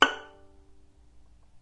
violin pizzicato vibrato